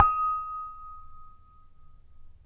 A crystal bowl hit with my finger. Tuned in Eb4. Taça de cristal batida com o dedo . Afinada em Eb4